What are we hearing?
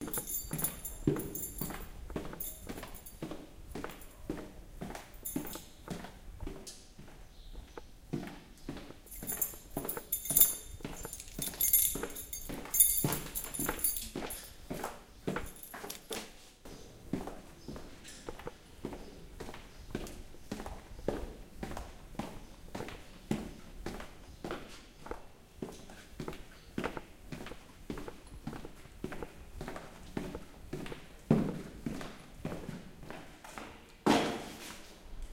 H4 - pasos con llaves
footsteps walking floor shoes keys keyring